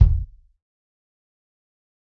Dirty Tony's Kick Drum Mx 044
This is the Dirty Tony's Kick Drum. He recorded it at Johnny's studio, the only studio with a hole in the wall!
It has been recorded with four mics, and this is the mix of all!
tonys, punk, raw, pack, realistic, kit, dirty, drum, tony, kick